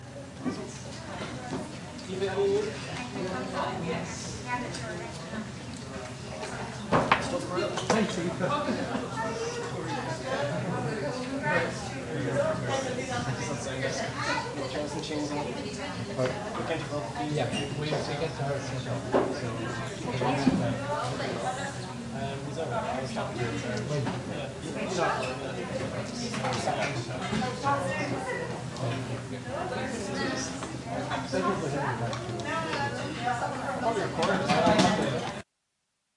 bray arts break time
A recording of an interval at a Bray Arts event in my hometown.
drink, break, people, atmosphere, chatting